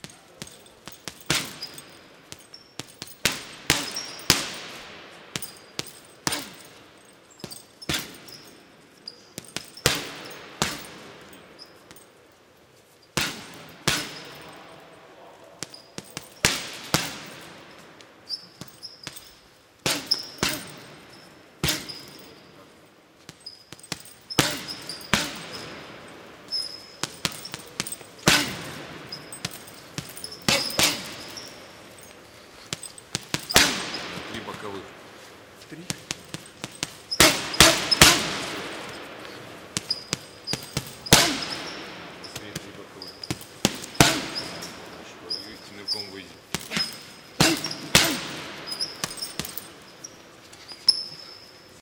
adult professional boxer hits punching bag with trainer comments in Russian 02
Professional boxer hits punching bag while training routine, his trainer gives some comments in Russian language. Huge reverberant gym.
Recorded with Zoom F8 field recorder & Rode NTG3 boom mic.
training, hits, punching, gym, fighter, kid, box, boxing, trainer, sport, Russian, punch, boy, punching-bag